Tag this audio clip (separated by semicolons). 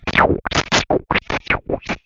blow; robot; binary; r2-d2; droid; science; wars; star; talk; chatter; fi; fiction; bleep; space; air; r2; futuristic; r2d2; scifi; sci; sci-fi; mechanical; astromech; boop